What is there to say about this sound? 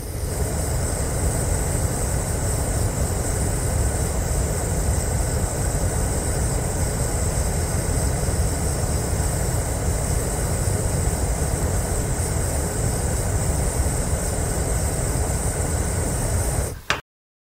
Gas stove clicking fire burner